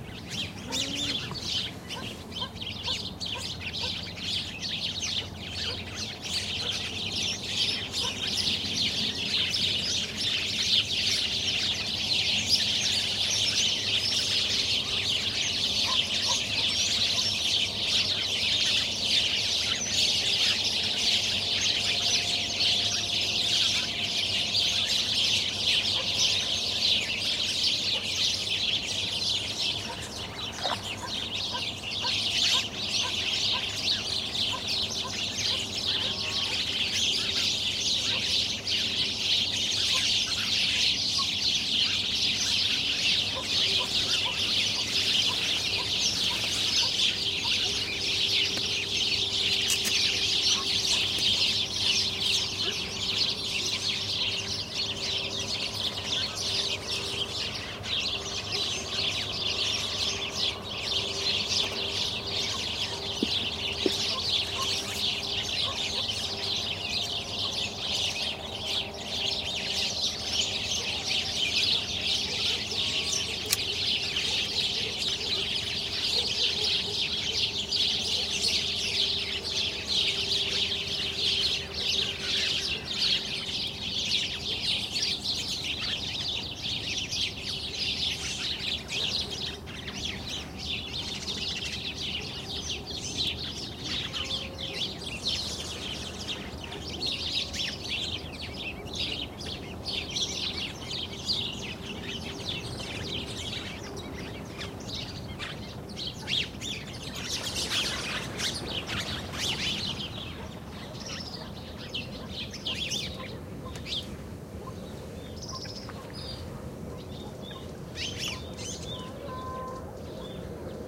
20061121.sparrows.marsh.01
marsh ambiance, with a large house sparrow group in foreground; cow moos, dog barks, and other distant noises. Sennheiser me66+AKG CK94-shure fp24-iRiver H120, decoded to mid-side stereo